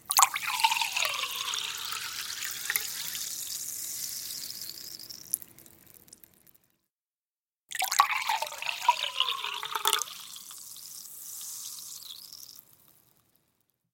Sparkling water (Badoit) in a glass.
glass, noise, sound, water